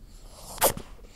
bunny sneeze
A tiny rabbit sneeze.
bunny, rabbit, sneeze